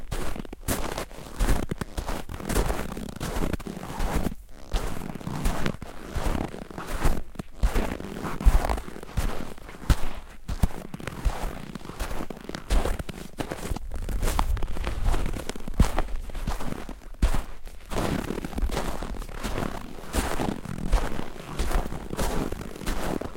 fotsteg på hård snö 4
Footsteps in hard snow. Recorded with Zoom H4.
hard, footsteps, snow